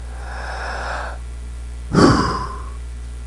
Content warning
Man breathing relief